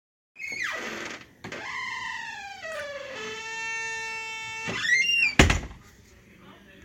Door close Dave (1)
A door closing that needs its hinges oiled
close, creak, door, squeak